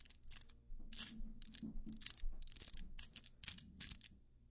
Metal 15 Puzzle
a metal fifteen puzzle being solved
fifteen-puzzle, metallic